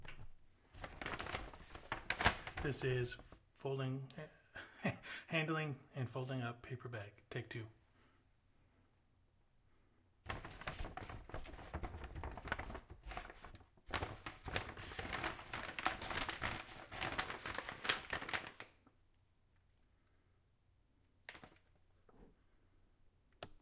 What It Is:
Me handling a paper grocery bag.
A mother handling a paper grocery bag.